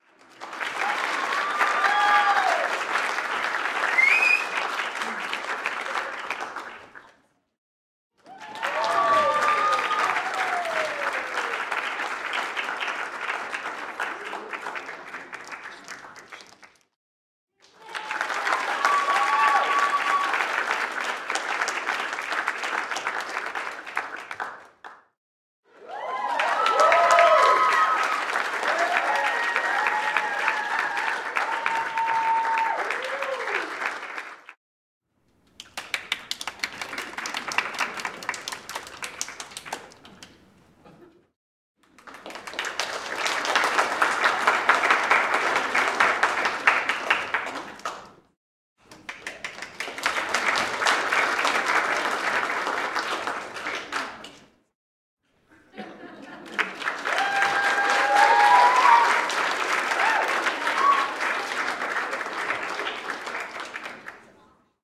Various Theatre Applause
Various medium sized audience applauses.
applause, clapping